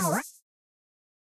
Cute abstract sound, I used this to represent an item being taken away from you in a game.